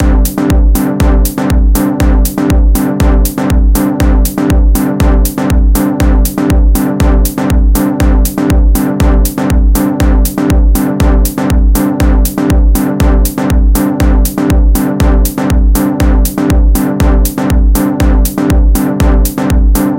DrumBass 80sUpdown Gsus4 120BPM
Actually, it's a little bit of a sound package. But sharing is always good. My drum bass sounds can be used in house, nu-disco and dance pop projects. Obviously when I was listening, I felt that these sound samples were a bit nostalgic. Especially like the audio samples from the bottom of pop music early in the 2000s. There are only drum bass sound samples. There are also pad and synth sound samples prepared with special electronic instruments. I started to load immediately because I was a hasty person. The audio samples are quite lacking right now. There are not many chord types. I will send an update to this sound package as soon as I can. Have fun beloved musicians :)
drum, loop, deep-house, hard, chord, soundesign, beat, programmed, electro, drum-bass, kick, rhytyhm, house, dance, nu-disco, bass, club, hihat, producer